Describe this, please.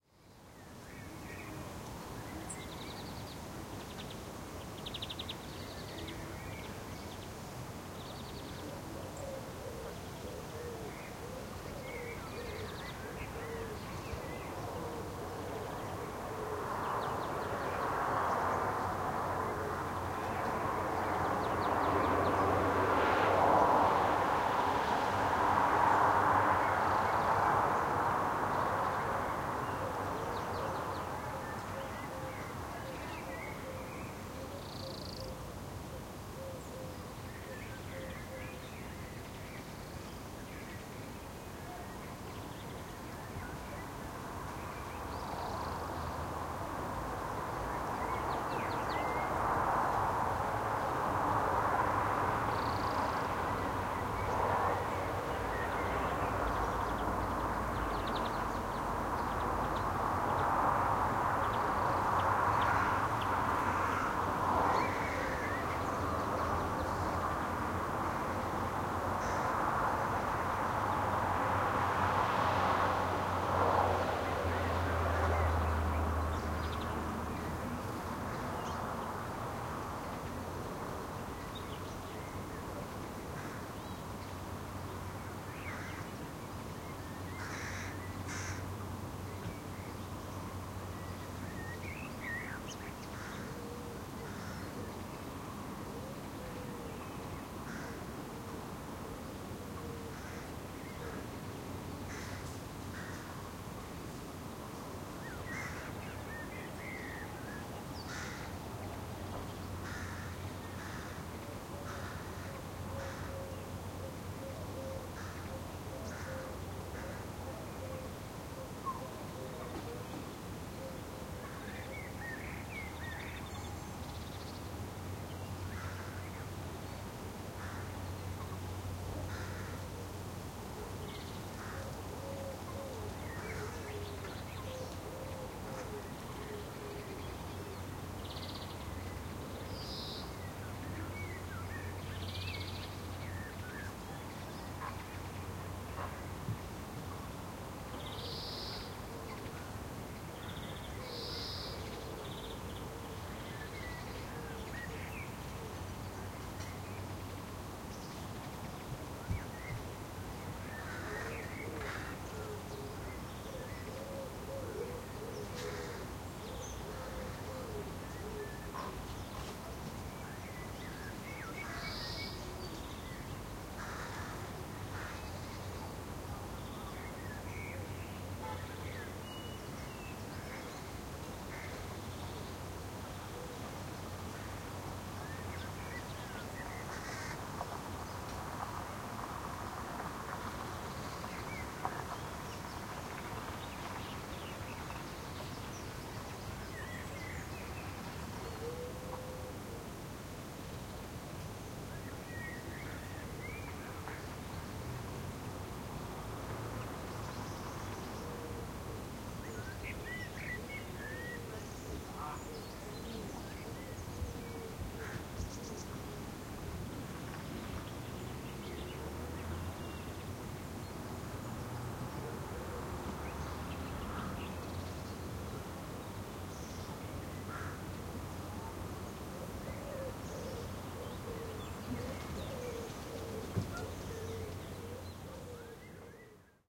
Ambience, garden, afternoon, summer, Foggy, Ordrup
A nice summer ambience in denmark. Birds are singing, and a light wind is whistling in the trees